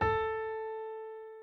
LA stretched
Simple keyboard/piano sound
do, Piano, so